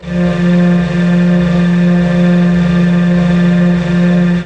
granny ahhh1
Created with Granulab from a vocal sound. Pulsing breathy sound.
granular, noise, pulsing